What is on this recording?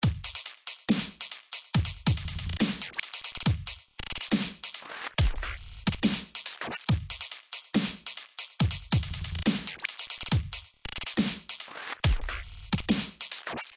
dubstep loop grossbeat 140BPM
A beat glitched using the vst "GrossBeat"
glitch, shaker, dubstep, drum, kick, snare, hi, hat, loop